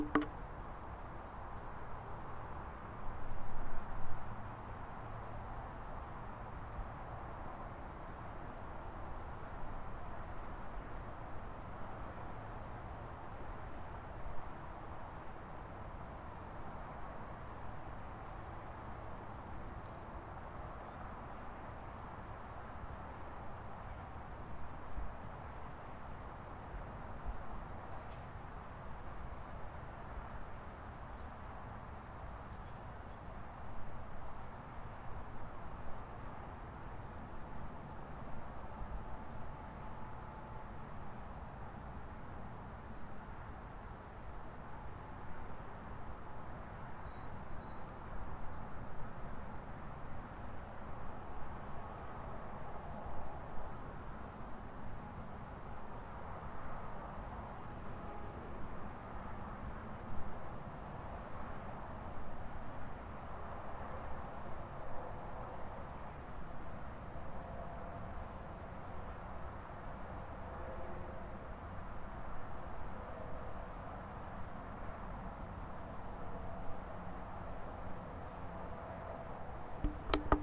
california night time suburb ambience distant traffic
Santa Barbara atmosphere recorded with Zoom h4n at night. Distant highway.
suburb, ambience, evening, night, quiet